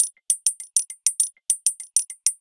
hi hat loop